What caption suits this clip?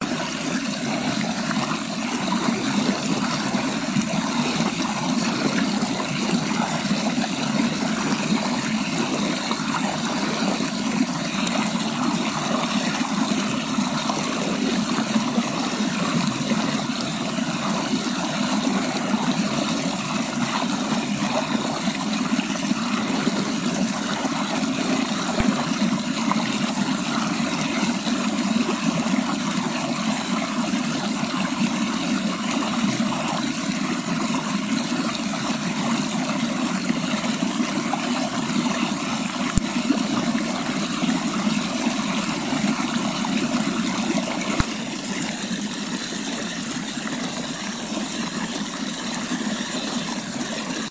The water hits rocks when going down the hill
current, stream, waterfall